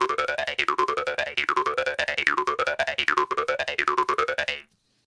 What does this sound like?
jaw harp24
Jaw harp sound
Recorded using an SM58, Tascam US-1641 and Logic Pro
bounce, doing, funny, harp, twang